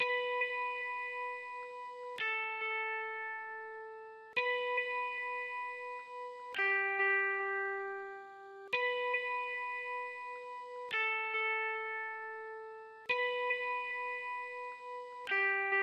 Guitar Harmonic 2 - Ping - 110bpm G
A looped 'ping' of three notes which will sit quite nicely on top of (or next to) my other upload which is unsurprisingly named 'Guitar Harmonic 1'.
fender, guitar, loop, rhythm, electric, clean